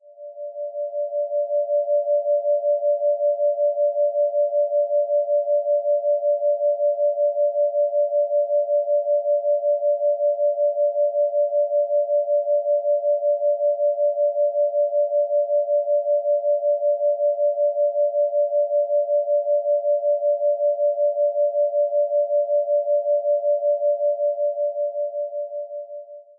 cosine synth pad made in pd